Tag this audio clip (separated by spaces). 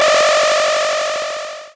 retro weapon arcade shooting video-game 8bit laser shot videogame spaceship shoot cartoon nintendo game games beam gun